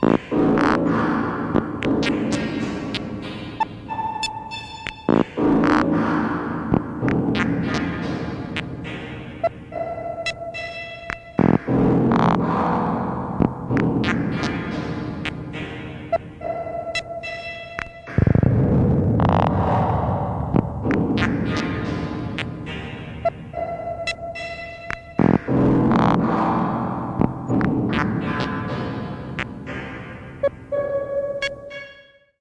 synthesizer sequence 49
synthesizer processed samples remix
sequence, synthesizer, transformation